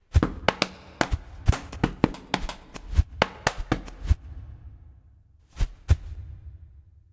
Fight Sequences 1
fx, punch, box, beat, hard